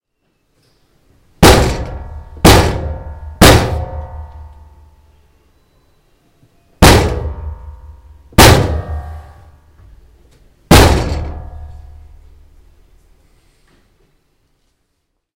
Just a quick recording using a C1000 to create the sound of someone breaking out from behind a metal door (a lift). Its actually a simple tool cupboard door.